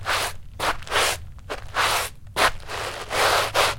Torka skor på matta
The sound of me wiping my shoes on a rug.
rug, shoes, wipe